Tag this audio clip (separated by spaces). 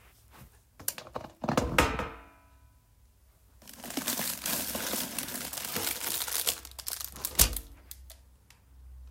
Door Remix Sound Trash-Bin Metal Trash Clue Murder